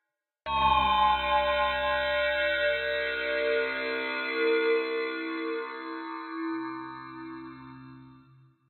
Siren-Ish Sound